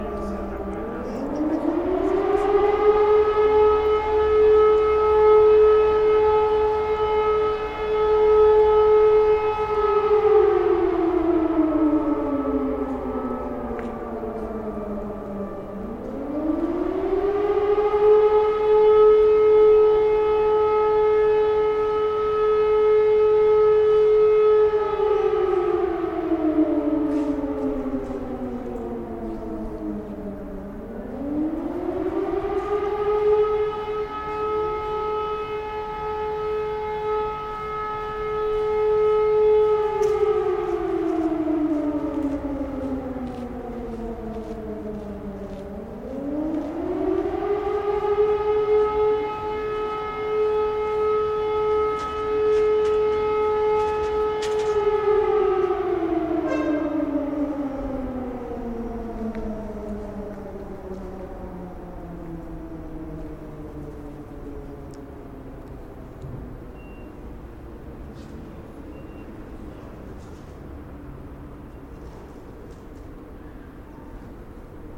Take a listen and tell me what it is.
Air Raid Siren Test
warning, Saint-Petersburg, emergency, air-raid, defense, field-recording, siren, civil-defense, Russia